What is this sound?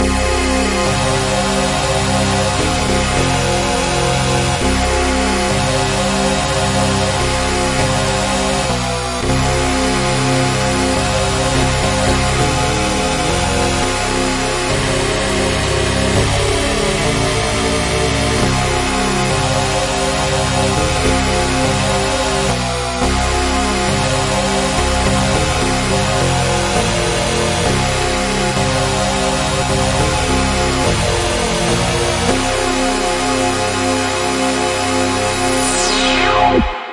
Free Grimey Bass Stem 104bpm C minor
Just a grimey bassline square wave. The stream for some reason has little glitches in it, but the wave file sounds clear.